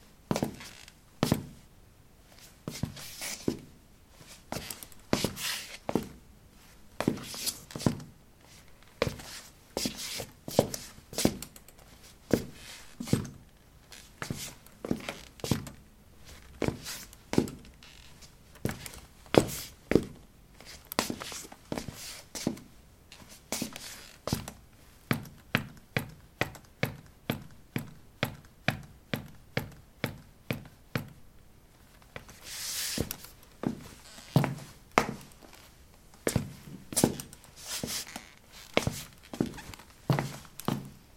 ceramic 17b boots shuffle tap threshold
Shuffling on ceramic tiles: boots. Recorded with a ZOOM H2 in a bathroom of a house, normalized with Audacity.
footstep, footsteps